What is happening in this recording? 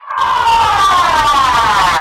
voice processed
this is my voice into an sm58 that has been processed over and over into a korg kontrol synth and edited extensively in logic
trigger, sample, weird, synth, voice